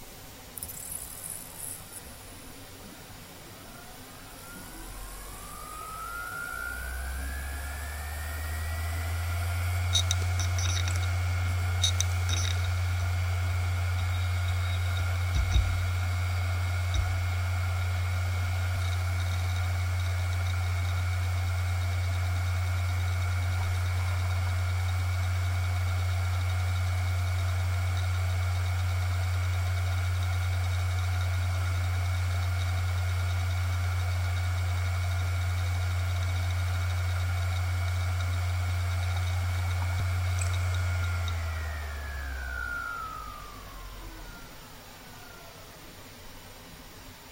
WD Green EZRX - 5400rpm - Slow spinup - FDB
A Western Digital hard drive manufactured in 2012 close up; spin up, writing, spin down.
This drive has 4 platters.
(wd30ezrx)
motor, rattle, disk, drive, hard, hdd, machine